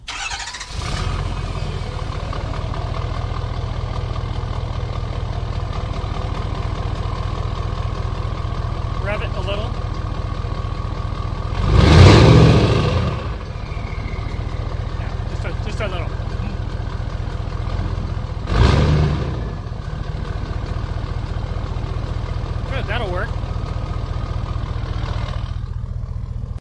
Diesel Start-Idle-Revs MZ000004
Ford F350 highly modified diesel engine with after-market turbo
Starts, idles, revs some background talking/directing
Recorded with Marantz PMD660 & Sennheiser e835 Mic
Diesel, exhaust, Truck, Tuned, Turbo, Turbo-diesel, Whistle